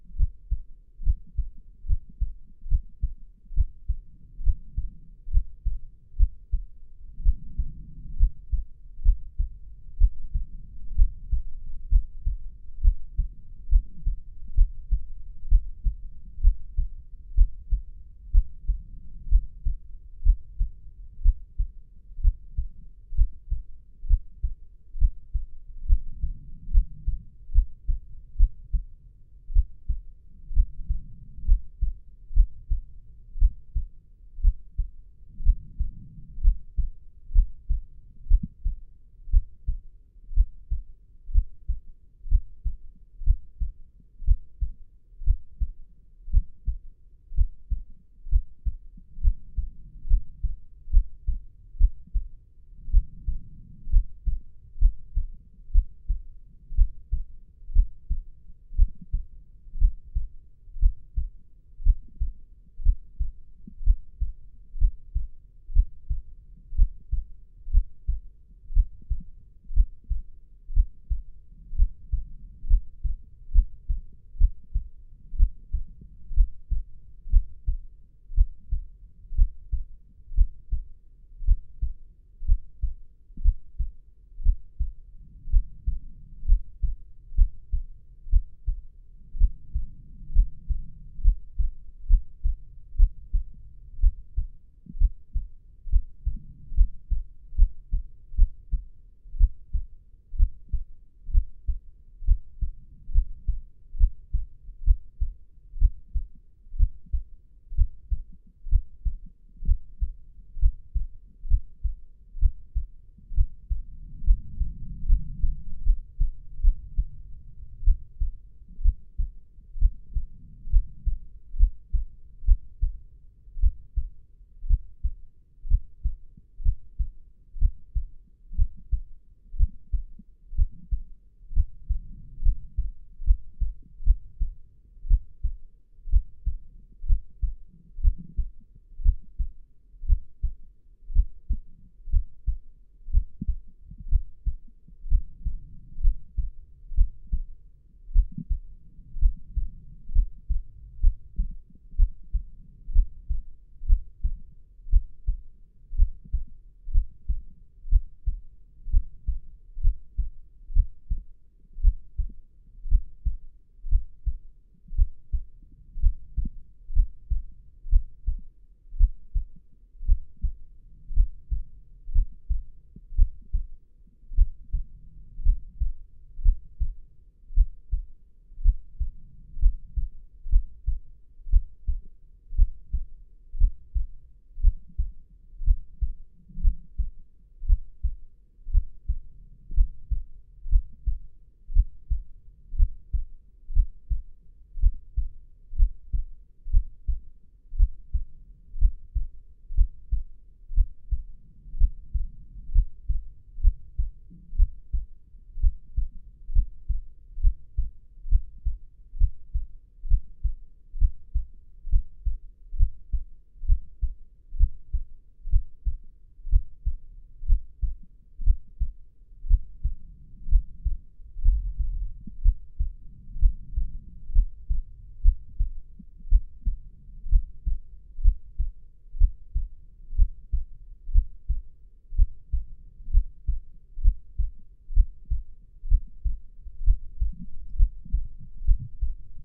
Real heartbeat sound
Audio recording of the beating heart of a 31 year old male at rest. Noise filtered out. Recorded November 11, 2018.